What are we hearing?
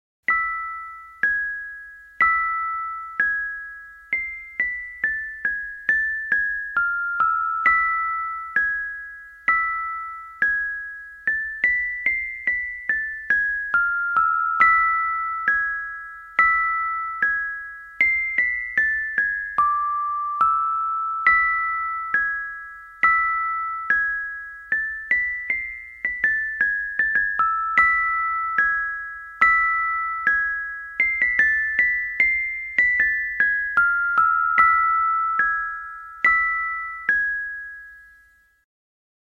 Strange Lullaby
bells, Burton, composition, creepy, electric, Halloween, Lullaby, music, piano, scary, song, spooky, spoopy, strange, Tim